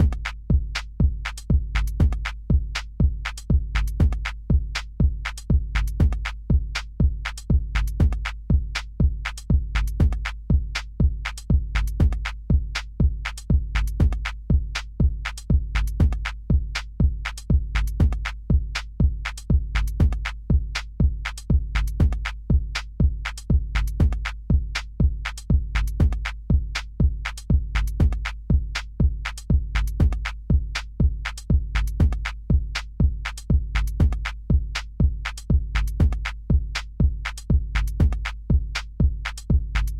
Drum loop.
A drum loop made in Reason.
drum, loop